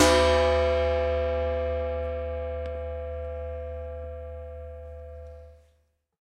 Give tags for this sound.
household; percussion

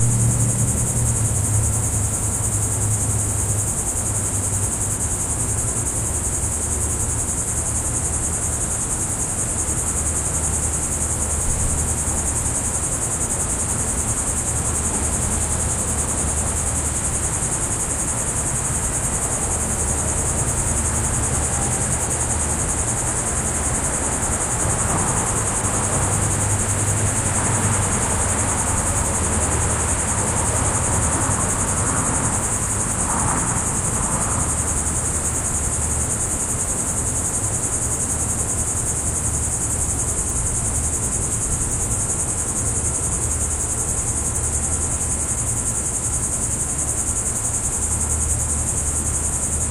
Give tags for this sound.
ambiance; field-recording

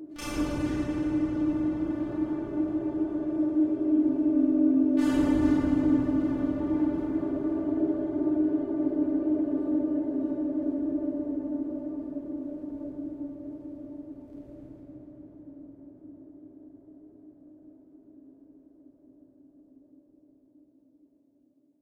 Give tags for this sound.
multisample; pad; space; drone; soundscape; artificial

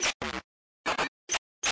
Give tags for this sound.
glitchbreak; freaky; breakcore; glitch; techno

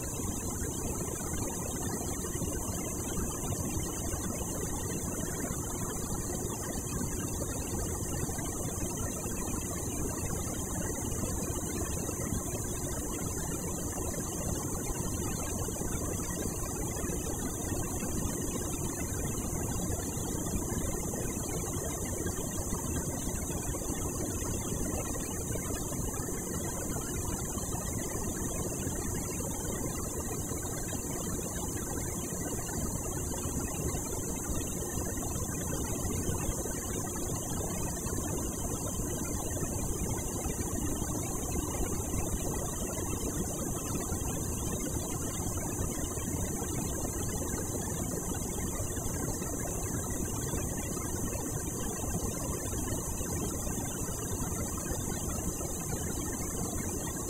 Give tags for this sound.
field-recording; school; sfx

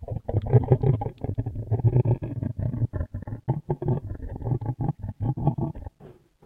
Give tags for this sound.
Dragon; language; Speakeing

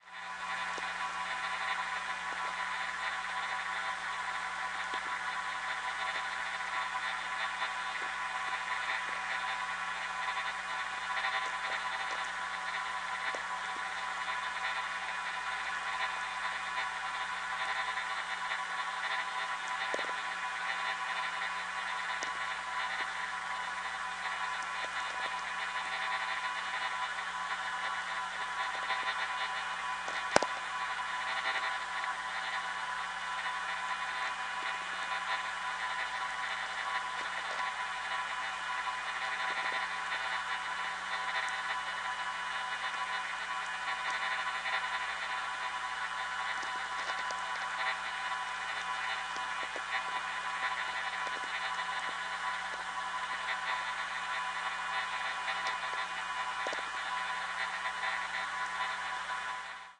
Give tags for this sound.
shortwave; static; radio; vlf; electronic; noise

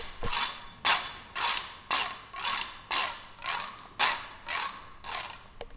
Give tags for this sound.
cityrings; santa-anna; spain

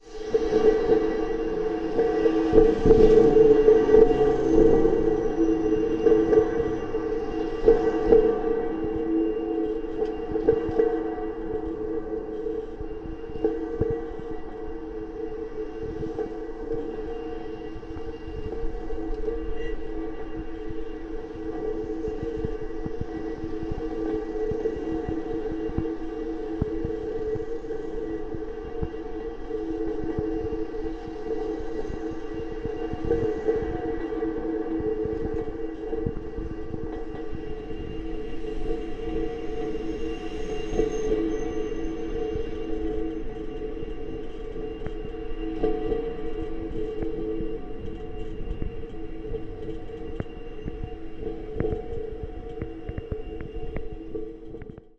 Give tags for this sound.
contactmicrophone; strange; railing; metallic; eerie; newport; bridge

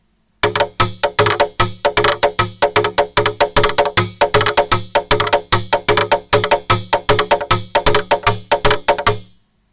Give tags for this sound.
bits; buiding-elements; fragments; lumps

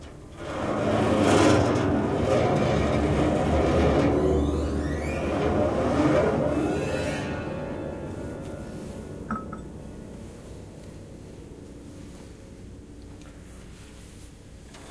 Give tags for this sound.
effect; fx; horror; industrial; piano; sound

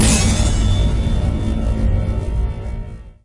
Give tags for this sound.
artifact; SciFi; space

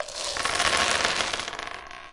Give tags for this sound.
rolling; dice; dumping

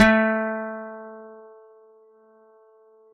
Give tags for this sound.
velocity; guitar; acoustic

